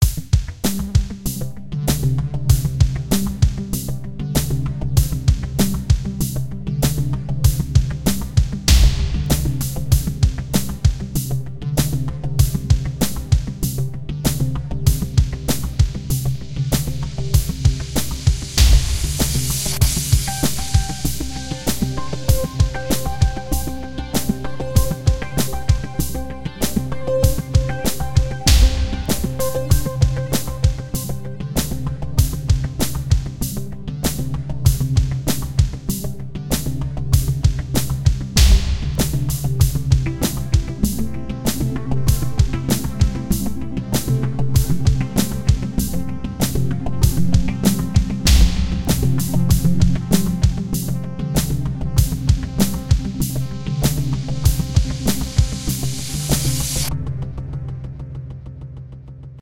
Unpretentious Reveal
This is a minimalistic unpretentious promo track made with technology, corporate life and vehicles in mind. For the alternative version without drums click here
ambient, business, chill, chillout, corporate, documentary, downtempo, drama, electronic, music, presentation, promo, soundtrack, synthesizer, trailer, tv